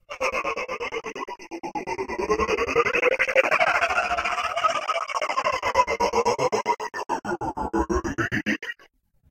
Super 8 Creature Attempt #1
I was watching Super 8 the other day and decided to try and make the sound of the monster.
voice, creature, super, creepy, scary, 8, sound, monster, monophonic